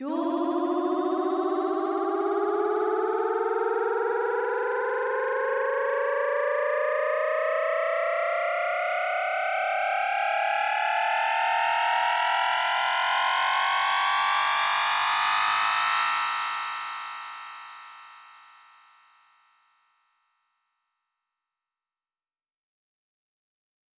Do Do Riser
Two octave riser in key of C made with granular synthesis from samples I got off this website :)
build, dance, drop, dubstep, edm, house, riser, suspense, tension, trance, trippy